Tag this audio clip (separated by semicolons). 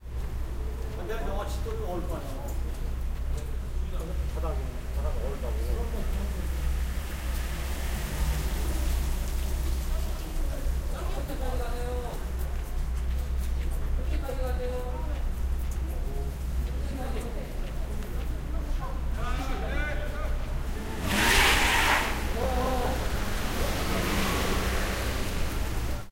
car; field-recording; korea; korean; seoul; voice